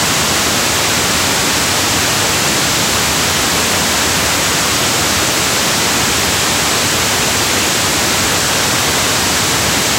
10 seconds of 'green noise', allegedly.
fx, hissing, relaxation, sound